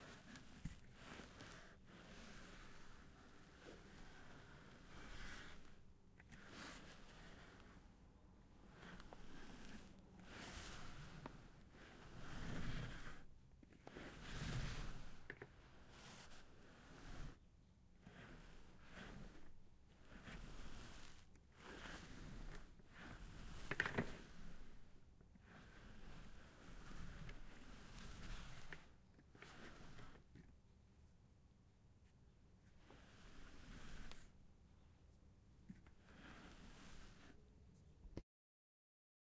Chair on carpet
A office chair draged across a carpet, recorded with a zoom H6
carpet, chair, dragging, floor, furniture, OWI